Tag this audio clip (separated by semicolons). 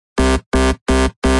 Sample; UK-Hardcore; Rave; Power-Stomp; Hardcore; Bass